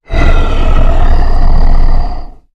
A powerful low pitched voice sound effect useful for large creatures, such as orcs, to make your game a more immersive experience. The sound is great for attacking, idling, dying, screaming brutes, who are standing in your way of justice.
videogame, male, fantasy, Speak, brute, arcade, indiegamedev, gaming, game, gamedeveloping, games, sfx, deep, monster, videogames, troll, indiedev, gamedev, Voices, Talk, vocal, Orc, RPG, voice, low-pitch